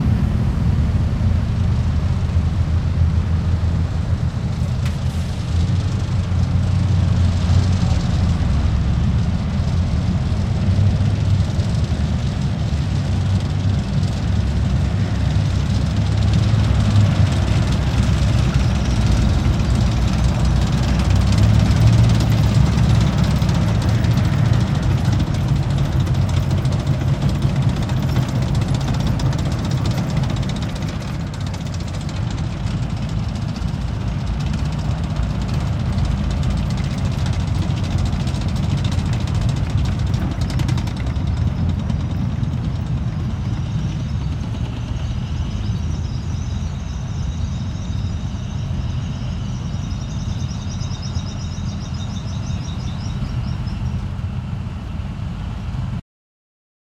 WAR-BMP-2, TANK-PASSING BY-BMP-2 passes by, crawlers rattling-0001
Heavy trucks, tanks and other warfare recorded in Tampere, Finland in 2011.
Thanks to Into Hiltunen for recording devices.